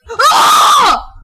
surprised exclamation
(LOUD)
lol i record my voice while playing video games now so that i can save certain things i say, you know, for REAL reactions to use for cartoons and stuff. a lot of my voice clips are from playing games with jumpscares, and that's where my screams and OOOOOOOHs come from. i used to scream ALL the time when playing jumpscare games, but now it's turned into some weird growl thing or somethin, i dunno. so yeah, lots of clips. there are tons of clips that i'm not uploading though. they are exclusively mine!
and for those using my sounds, i am so thrilled XD
voice, scared, jump, female, english, talk, speak, startled, exclamation, woman, scare